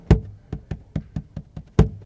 Drumming lightly on the outside of a recycling bin.